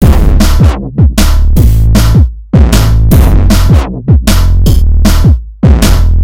Loop155BPM
A set of Drum&Bass/Hardcore loops (more DnB than Hardcore) and the corresponding breakbeat version, all the sounds made with milkytracker.
drum, loop, bass, 155bpm, hardcore